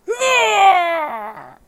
Male Death 02
Recorded by mouth
death, die, human, male, man, scream, speech, vocal, voice